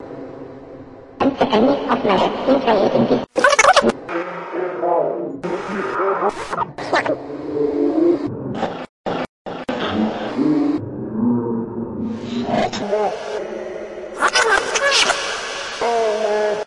Radically cut up voice samples